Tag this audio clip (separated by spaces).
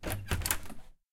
close door field-recording handle open